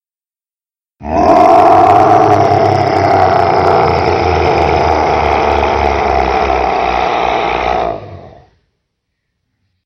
Beast roar
The sound of a very, very upset thing. Made by slowing down my own voice in Audacity, and doubling it up to make it sound demonic.